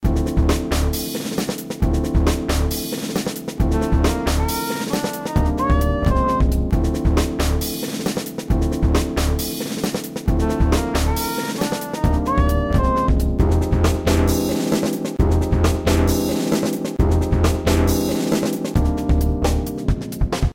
made up of oriolgos beat combo 4 and uauaua's sax solo chopped with sony sound forge